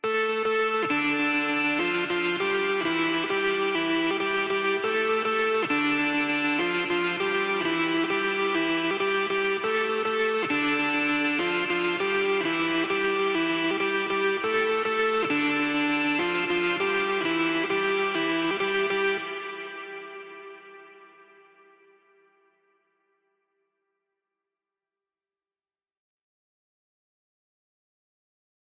Mid, pipe-like synth line released as part of a song pack. 100 BPM Tail included, can be looped in DAW.
Electronic, Pipe